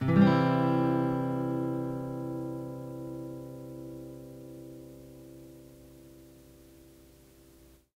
Tape Ac Guitar 7
Lo-fi tape samples at your disposal.